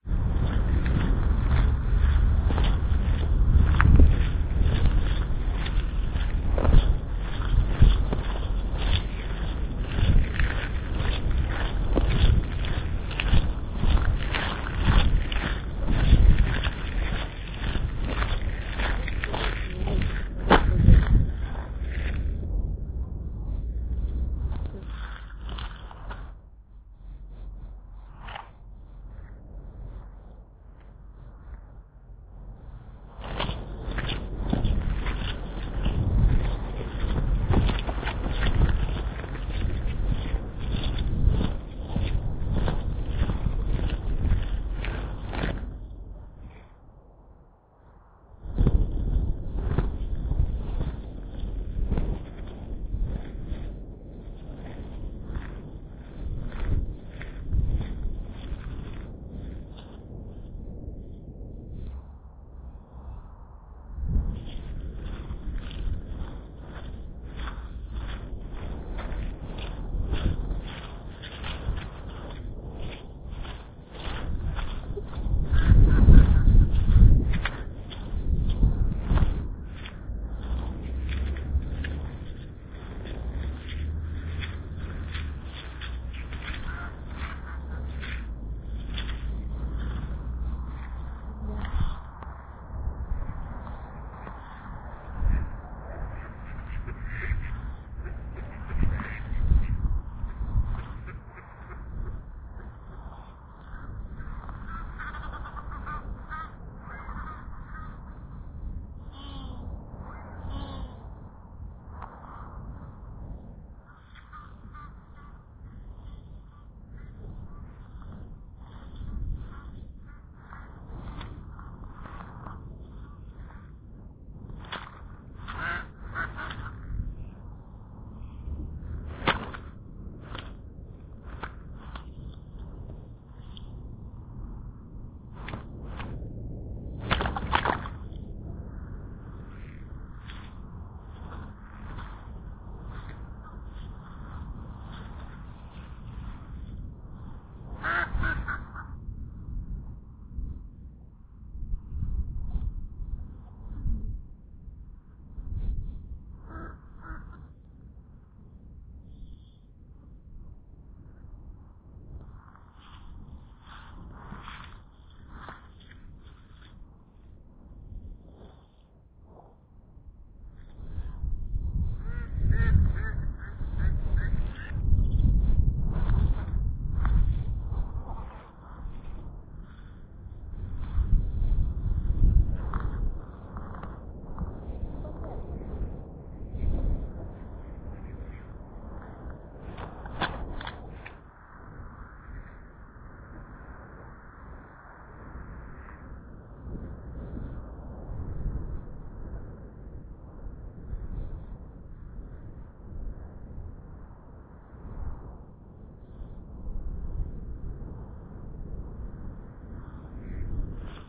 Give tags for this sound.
city ducks field-recording park ulp-cam

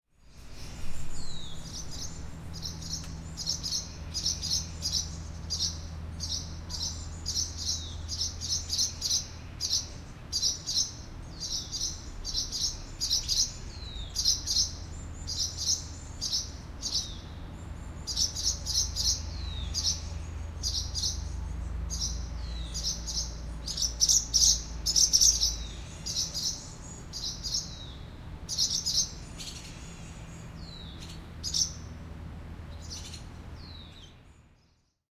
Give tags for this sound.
animal barn bird field forest nature outdoor swallow woods

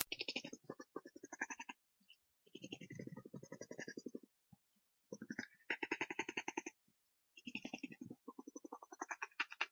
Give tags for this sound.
Man-Made Click Strange

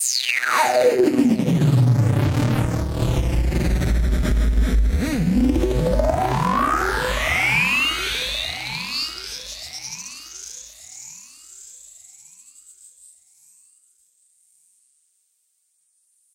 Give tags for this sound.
acid electronic fx sfx synth

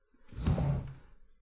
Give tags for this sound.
table chair

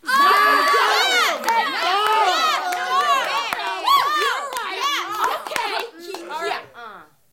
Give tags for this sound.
crowd theatre studio audience group theater

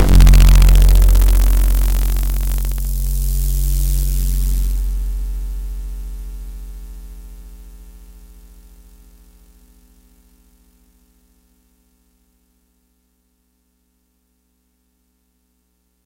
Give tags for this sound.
bass glitch toy pianola bitcrushed distorted percussion drum analogue circuitbending bass-drum

